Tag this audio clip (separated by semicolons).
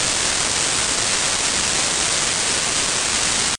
ambience; ambient; atmosphere; background; background-sound; general-noise; noise; tv-noise; white-noise